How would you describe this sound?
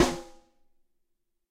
Ludwig Snare Drum Rim Shot
Drum, Ludwig, Rim, Shot, Snare